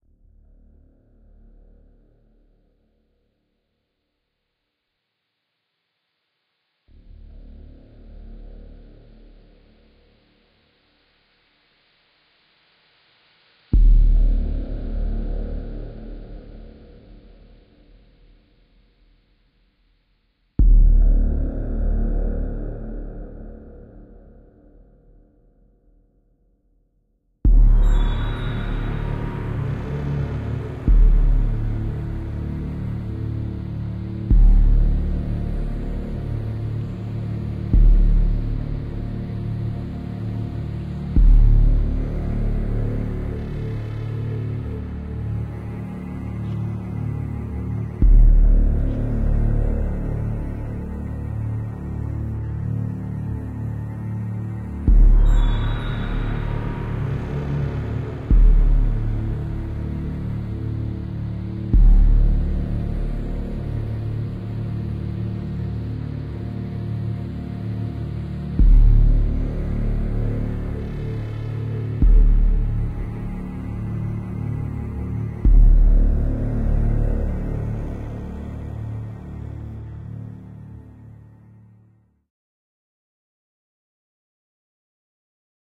Dark Cinematic Intro 01
Dark Cinematic Intro.
Nothing else to add..
Ambient, Bass, Cello, Cinematic, Creepy, Dark, Film, Game, Indie, Intro, Movie